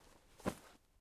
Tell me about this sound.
A short clip of a bag rustling. Meant to mimic the sound of C4 being placed.
bag, rustle, rucksack